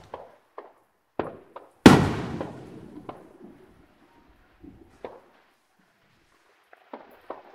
Loud boom, aerial fireworks.